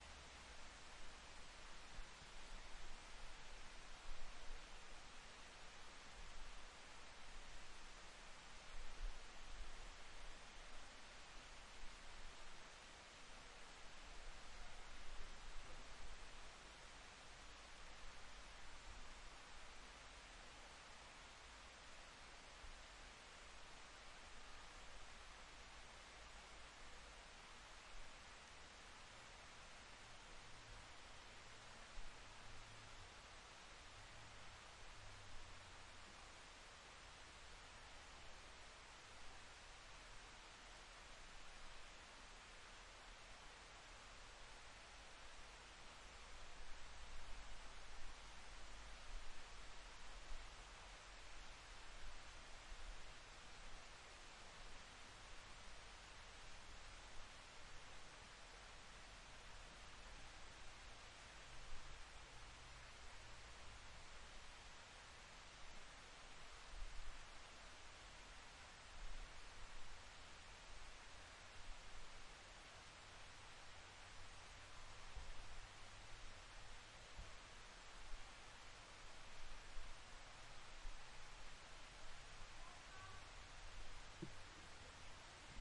forest with river in background
Chestnut forest in the Montseny's mountain Catalunya near santa fe with a small creek in background.
steam, birds, trees, birdsong, forest, river, nature, brownforest